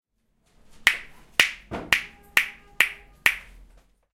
mySound-49GR-XiaoTian
Sounds from objects and body sounds recorded at the 49th primary school of Athens. The source of the sounds has to be guessed.
49th-primary-school-of-Athens, Greece, hand, mySound, snap, TCR, XiaoTian